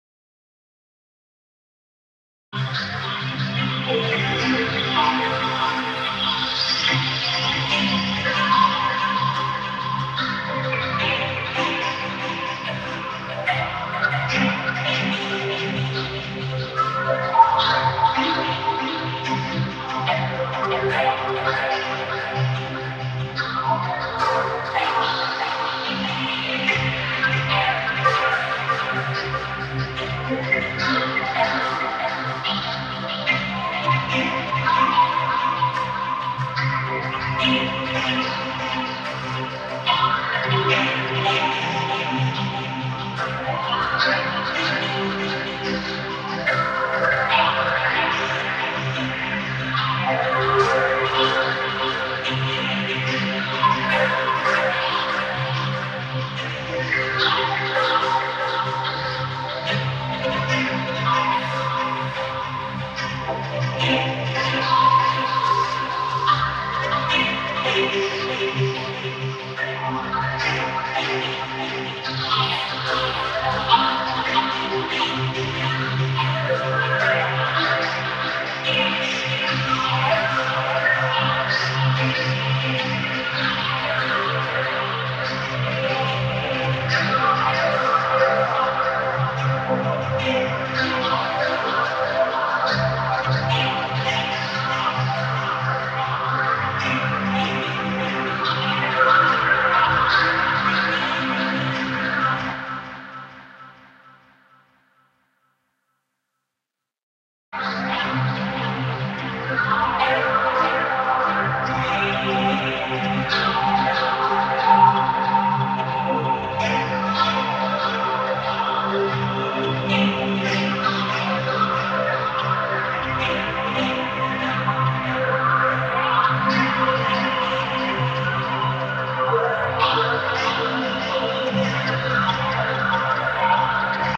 ambidextrous language
This sound is from a collection of Sound FX I created called Sounds from the Strange. These sounds were created using various efx processors such as Vocoders, Automatic filtering, Reverb, Delay and more. They are very different, weird, obscure and unique. They can be used in a wide variety of visual settings. Great for Horror Scenes, Nature, and Science Documentaries.
dark, chaotic, Different, disturbing, Unique, Efx, bright, Sound, Universe, confused, big, Space, Nature, FX, Strange, aggressive, Weird, Ufo, calm, Soundtrack, Transformational, discovery